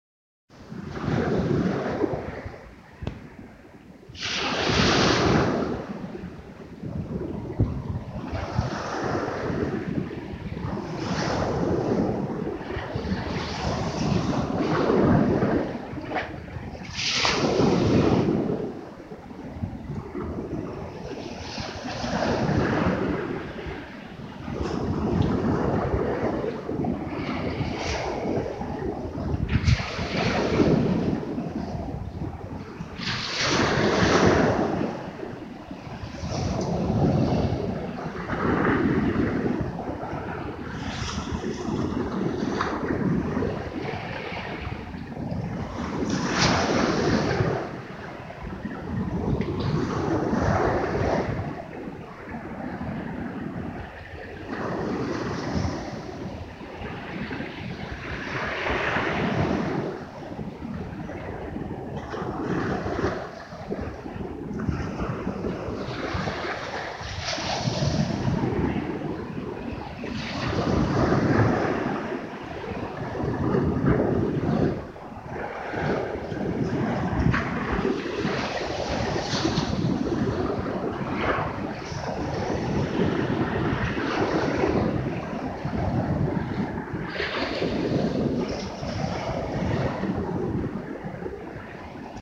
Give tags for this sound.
beach; crash; lapping; sea; shore; water; waves

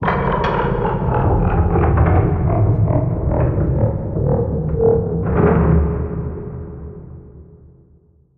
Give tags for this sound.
down; Powering; Machine

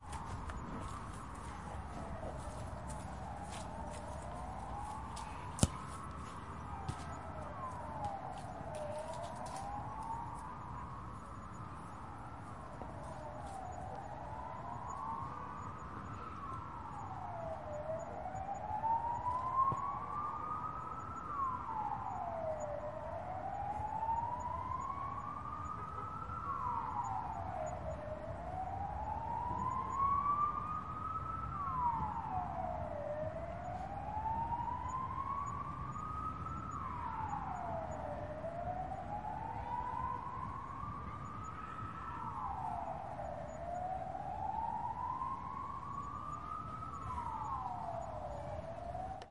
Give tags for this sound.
ambient; field-recording; park

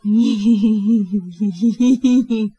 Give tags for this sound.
laugh; voice; girl; female; sneaky

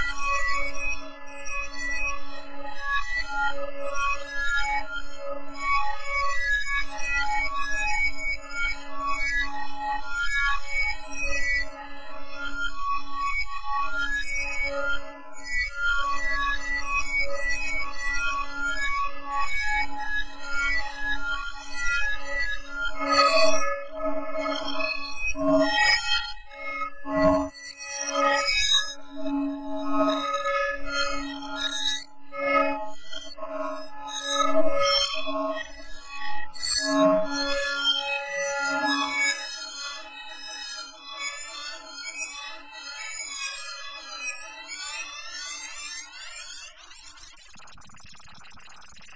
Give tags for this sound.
bass; experimental; glitch; growl; synth

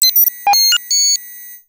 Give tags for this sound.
computer; blip; sound

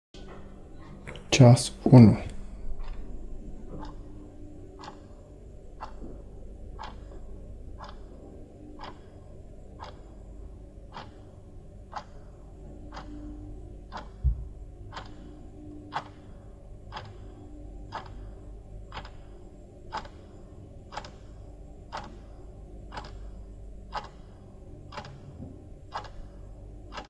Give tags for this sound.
big; clock; old-clock

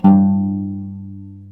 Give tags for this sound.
g2; oud

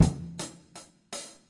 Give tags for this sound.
drum; loop

